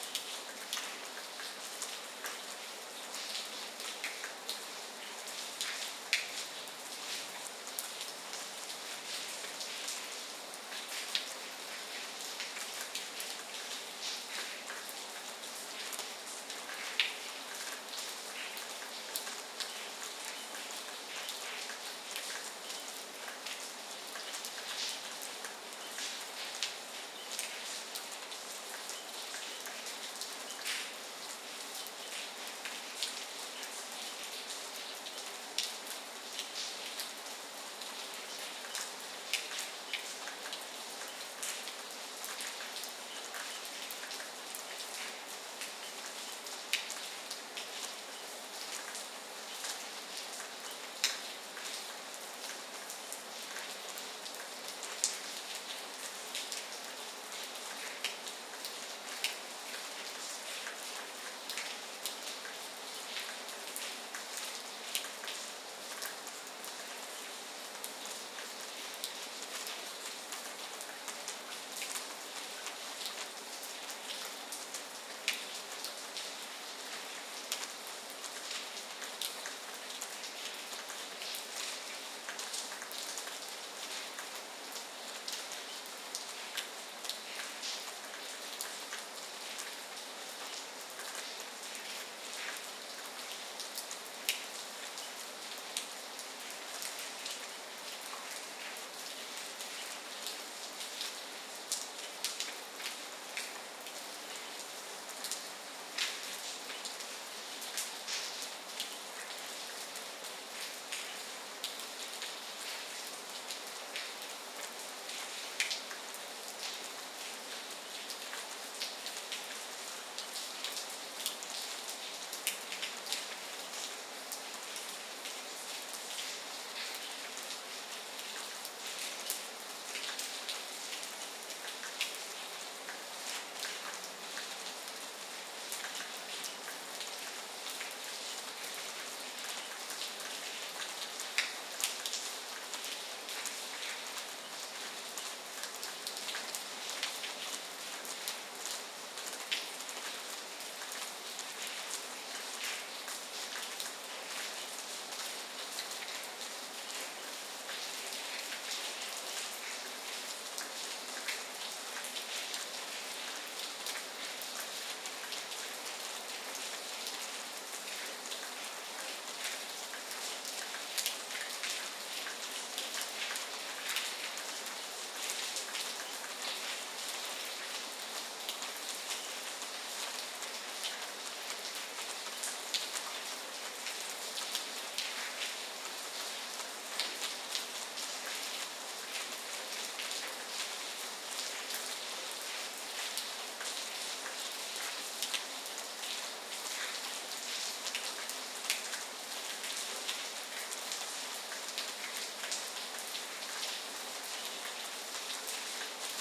AMB M City Rain Light
This is the sound of light rain falling in the courtyard of my apartment building.
Recorded with: AT 4073a, Sound Devices 702t
ambience, city, drip, drizzle, light, rain, splatter, water